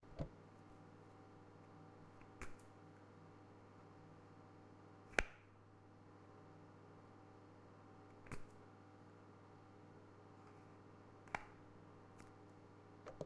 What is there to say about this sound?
This is the sound of a toothpaste lid popping on and off.
bathroom, lid, pop